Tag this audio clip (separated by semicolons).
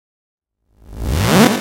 electro; hardstyle; hit; house